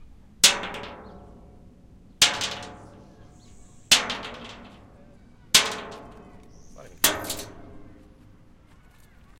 OWI Hitting metal (Bullets V2)
Tiny batteries falling on top of a metal plate